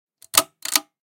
Desk Lamp - Chain Pull (Medium)
This is the sound of a desk lamp chain being pulled at a medium speed.
- PAS
Chain, Click, Lamp